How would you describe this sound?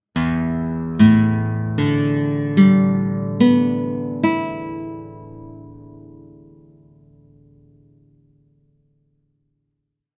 Flamenco Open Strings
Flamenco guitarist just prior to asking why I wanted the open strings played.
acoustic-guitar, flamenco, guitar, instrument, instrumental, nylon-strings, stereo, strings